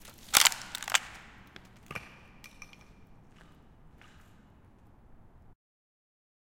crunch and roll
Recorded at an abandoned factory space in Dublin Ireland. With Zoom H6, and Rode NT4.
bottle, echo, industrial, space